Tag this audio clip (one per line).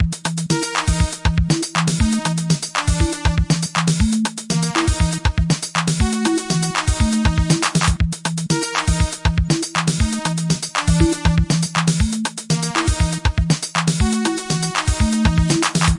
game
gameloop
games
loop
melody
music
organ
piano
sound
synth
tune